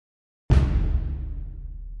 This big drum hit is stereo and perfect for any kind of music or sound effect to highlight an emphatic point of your project.